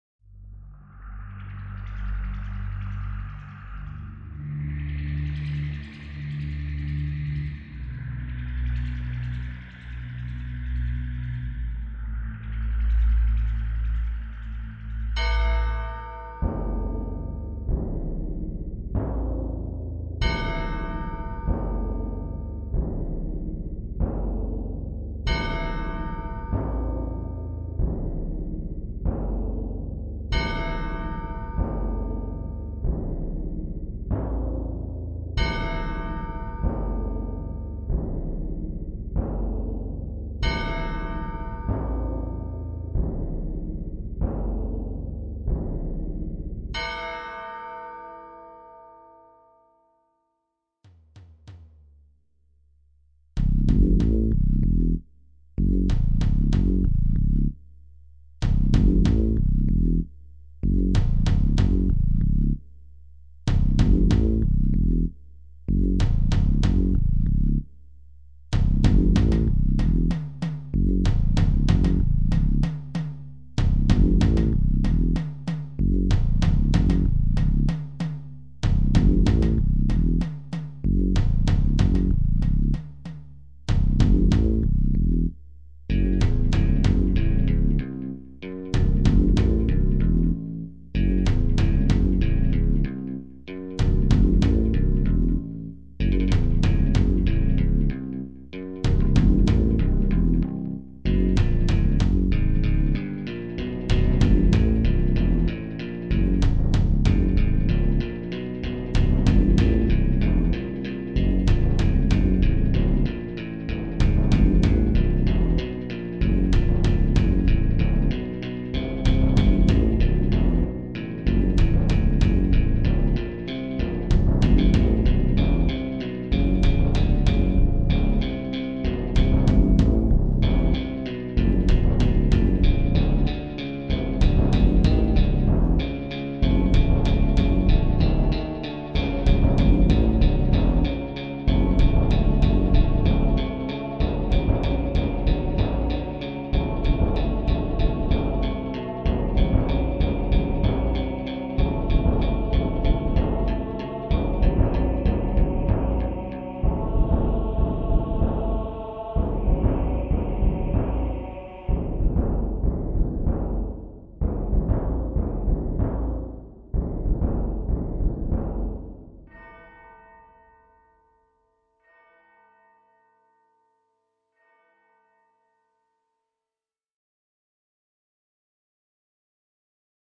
A song I made for an OpenRA mod.
Not very good but its my first song.
scifi, music, slow, dark, atmosphere, horror, sci-fi, western